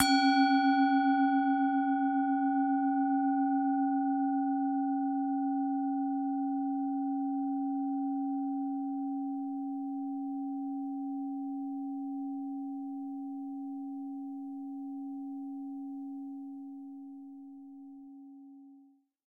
Tibetan bowl center hit.
In case you use any of my sounds, I will be happy to be informed, although it is not necessary.
bowl, percussion, ethnic, gong, hit, clang, ding, tibetan, harmonic